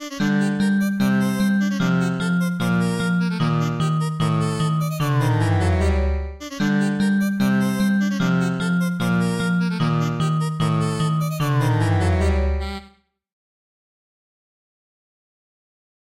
This theme was composed at the BeepBox website. This song was inspired from the song of the same name by Albert Marlowe.